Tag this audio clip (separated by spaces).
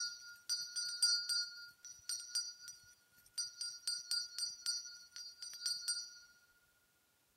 1 timbre one